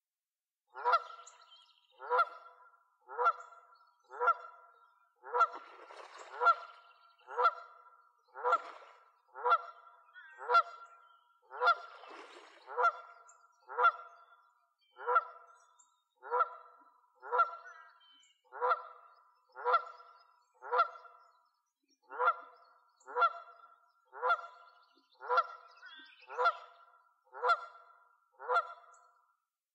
Clean Goose
A cleaned recording of a goose. Wind and rustling leaves were removed.
goose, birds, nature, field-recording, clean